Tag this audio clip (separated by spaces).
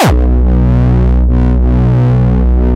distortion gabba kick